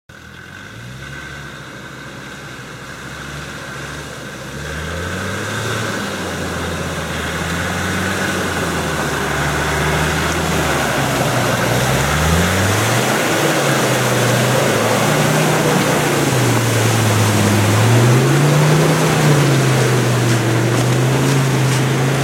Land Rover on muddy road and water